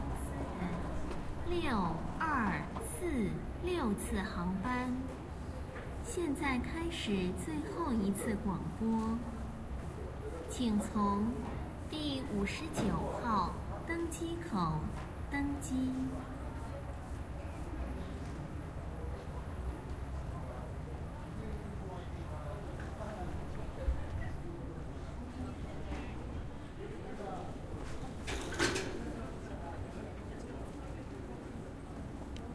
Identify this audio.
Recorded at Narita Airporrt with a Zoom H4n
airlines, airport, anno, announcement, flight, intercom, madarin